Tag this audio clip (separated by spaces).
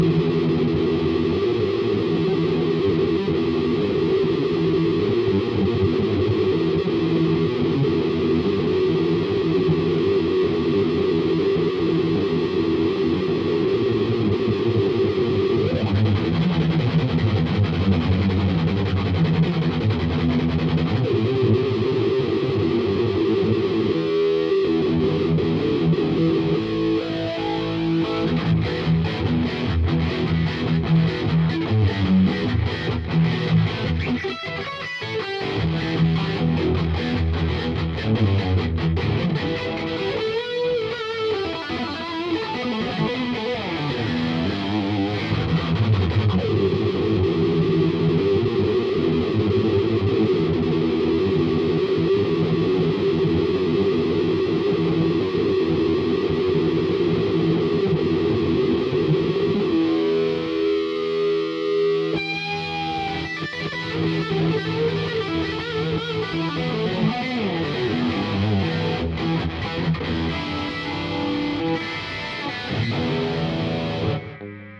distortion guitar guitar-jam heavy jam metal rhythm rhythm-guitar the-drifter